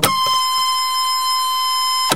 noisy printer sample, that i chopped up for a track of mine, originally from..
user: melack